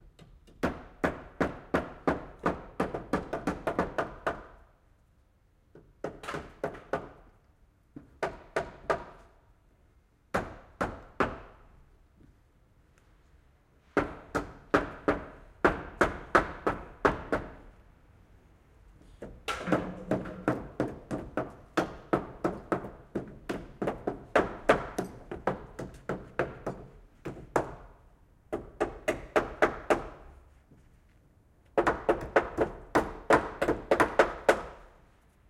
The house opposite of mine gets a new roof and I have an extra alarm clock. The recorded sound is that of the craftsmen building the wooden construction. Marantz PMD670 with AT826, recorded from some 10 metres away. Unprocessed.
build, building, construction, environmental-sounds-research, field-recording, hammer, hammering, roof, wood